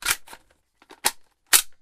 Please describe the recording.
Recorded from a steyr aug airsoft gun. Reload sound in stereo.
click, metal, rifle, magazine, load, reload, gun, airsoft, aug